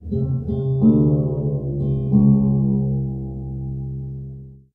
Parlour Guitar Tuning 2
A stereo recording of a parlour (parlor) guitar being tuned. Zoom H2 front on-board mics.
acoustic, guitar, parlor-guitar, parlour-guitar, tuning